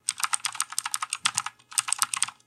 Typing on a mechanical keyboard